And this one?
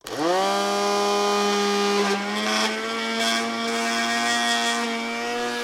Sound of belnder recorded in studio.
blender, appliances, studio-recording